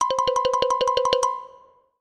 Xylophone for cartoon (12)
Edited in Wavelab.
Editado en Wavelab.
xilofono, xylophone, cartoon, animados, dibujos, comic